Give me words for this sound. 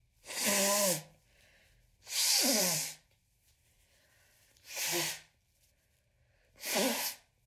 This sound was recorded by the use of a Tascam. It is a person blowing their nose into a tissue. There's a variety of choices to choose from.
blow, ill, blowing, flu, sick, nose, OWI, cold